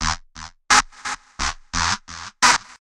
People....We've got a dance emergency...Recorded at 174.372bpm.
dance, synthesized